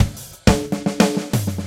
I made these loops about 1yr ago for a project I was working on. I know how difficult it is to find free drum loops in odd time signatures, so I thought I'd share them